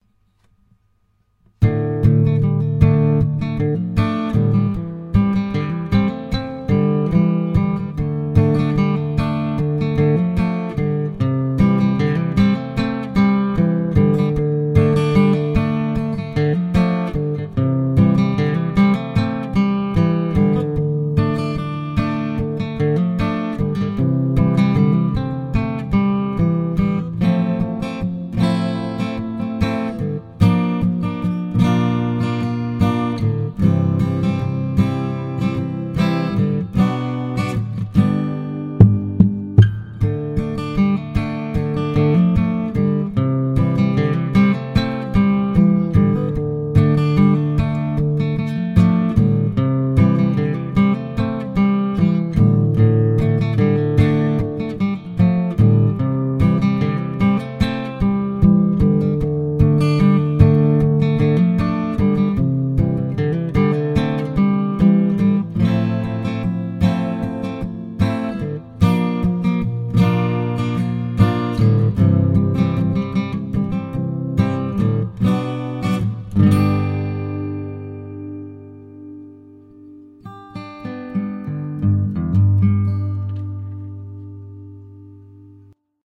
This is easey acoustic composition, like minor country music. Temp = 150 btm. Key in "D".
acoustic, chords, clean, country, guitar, Open, open-chords